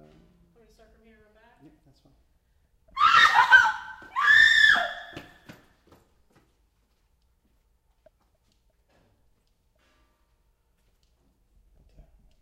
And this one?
girl scream frank
girl, scared